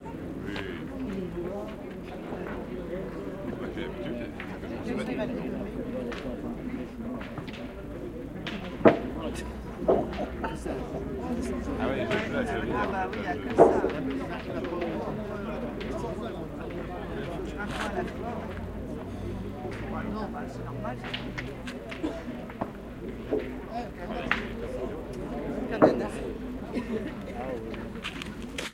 The sound of competitive boules during the French National Championships 2007. Includes the sounds of boules hitting each other and the backboard as well as the voices of the players.
atmosphere, field-recording, france, boules, ambience, speech